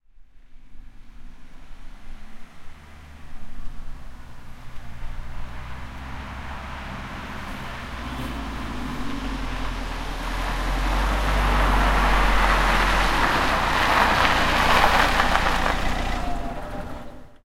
Auto with fadeout
Diesel car drives from the distance and then the engine stops. With small fadeout at the end of the clip.